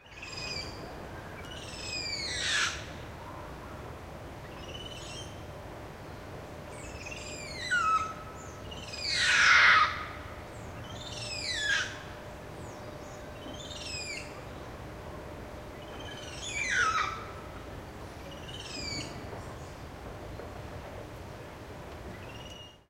black spider monkey04
Recording of a Black Spider Monkey chattering and screaming. Recorded with a Zoom H2.
ape, field-recording, jungle, monkey, primate, rainforest, spider-monkey, zoo